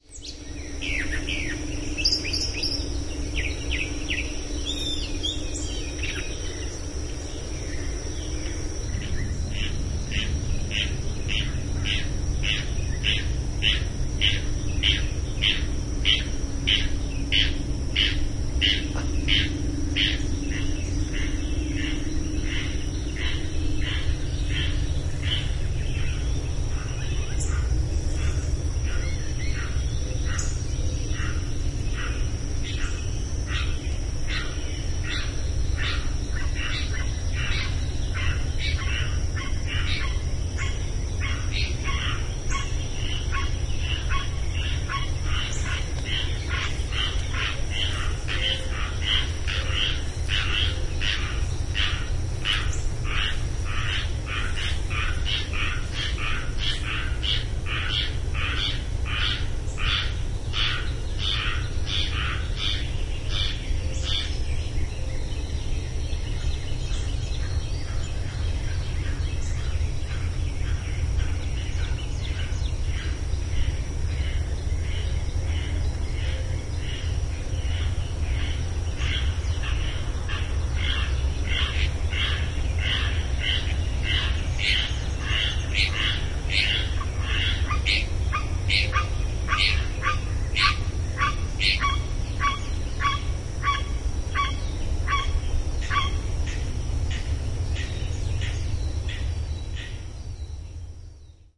Track 030 Alabama frogs and birds
Dothan, Alabama US 16Jun08 First attempt at recording nature sounds in a friends backyard. Core-sound omnis with Sony RZ90 Mini disc. Air conditioner hum, trucks and car traffic in distance.
Tried to equalize down the hum of air conditioners from the neighborhood